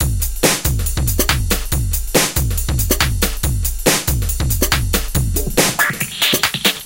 Hard aggressive drum-n-bass style beat. Has a fill in the fourth bar.
140bpm, beat, break, breakbeat, distorted, drum, hard, loop, synth